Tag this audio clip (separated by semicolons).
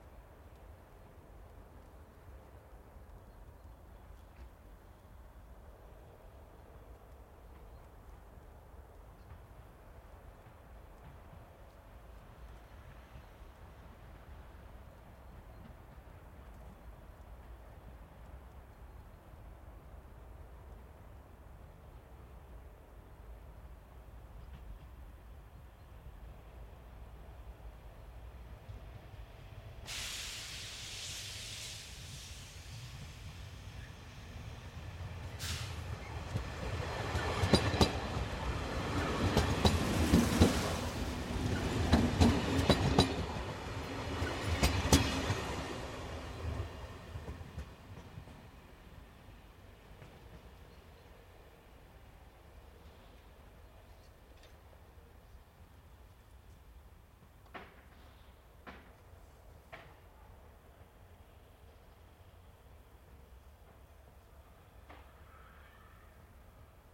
DPA-4017; train